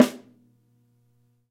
X-Act heavy metal drum kit. Tama Artwood Custom Snare Drum (14" x 5.5"). Recorded in studio with a Audio Technica AT3040 condenser microphone plugged into a Behringer Ultragain PRO preamp, and into a Roland VS-2400CD recorder. I recommend using Native Instruments Battery to launch the samples. Each of the Battery's cells can accept stacked multi-samples, and the kit can be played through an electronic drum kit through MIDI.
artwood, heavy, snare, metal, tama, kit, drum